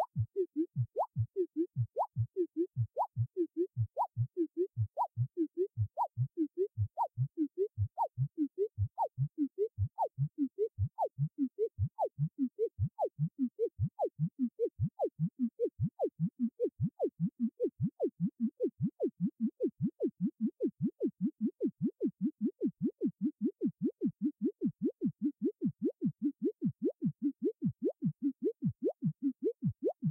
Patch1 Small 3 LFO patch
Part of a little private dare with user gis_sweden.
I had to create a virtual synth patch with 3 LFOs. 2 of the LFOs would modulate the Oscillator and the other LFO was to modulate the Voltage Controlled Amp.
The sound was to last 30s.
The sound starts with a pop because the Oscillator and the LFOs are free-running and there was no instruction to use an Envelope to control the sound volume (so basically the synth is always 'On', whether you press a key or not).
This sound was created using the kamioooka VST from g200kg. The Oscillator was set to sine wave and the 3 LFOs to triangle.
kamioooka, LFO, modular, rhythmic, synth, threelofpatch, virtual-modular, VST-modular